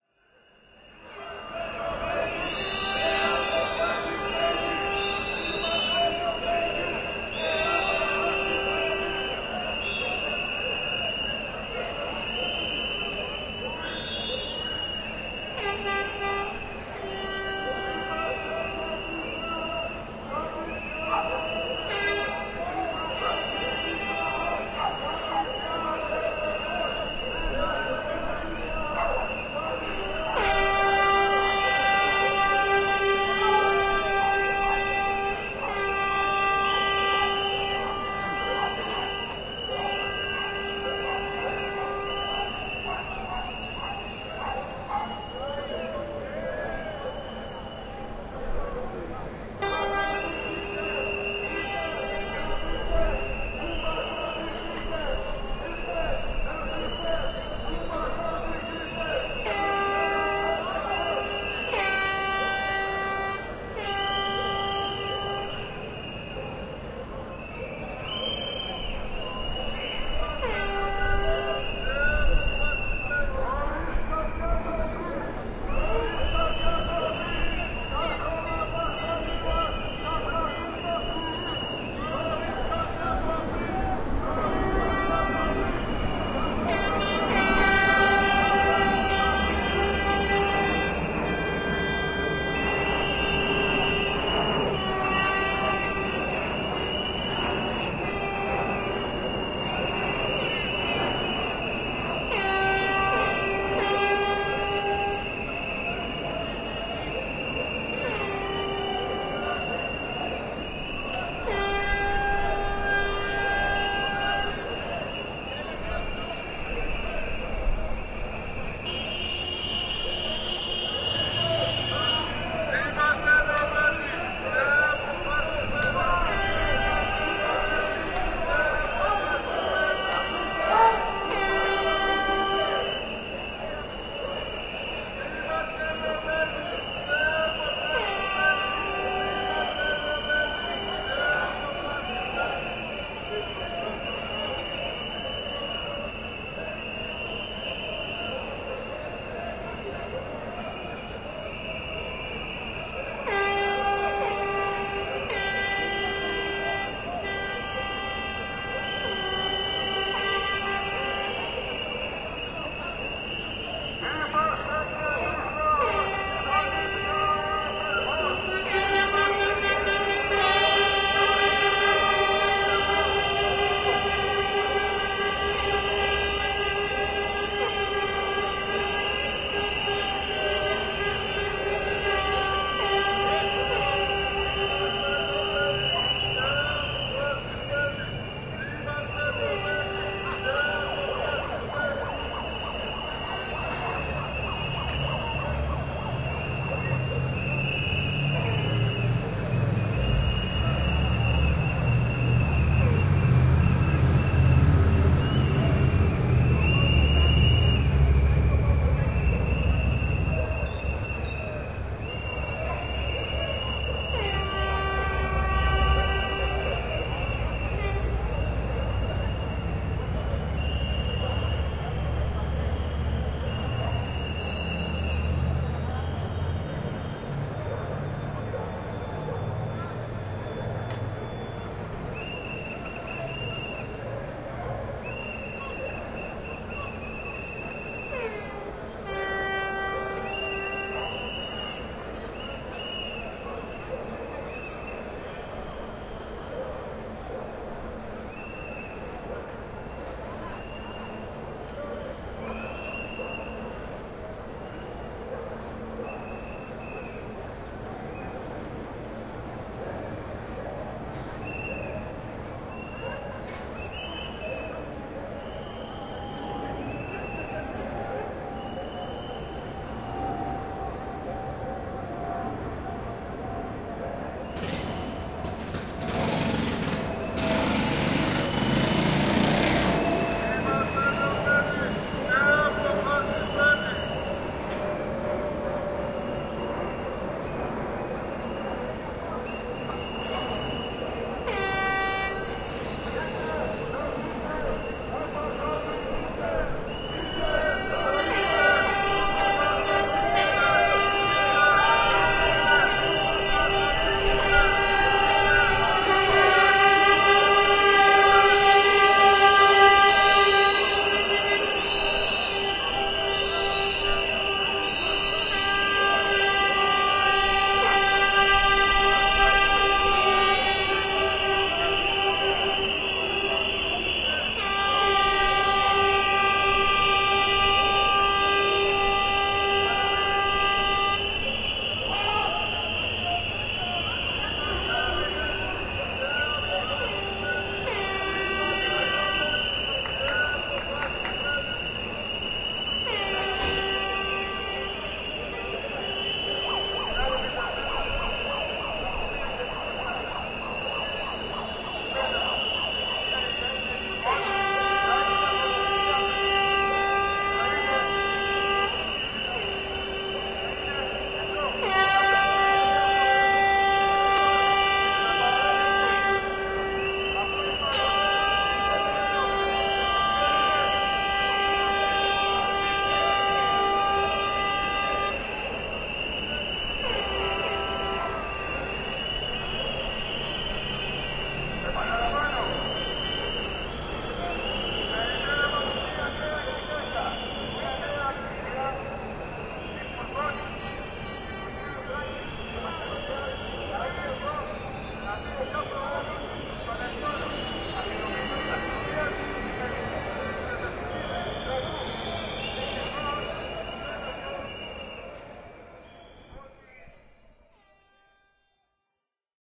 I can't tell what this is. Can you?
A greek riot in Thessaloniki 2018
Greek, people, Police, protest, riot, Thessaloniki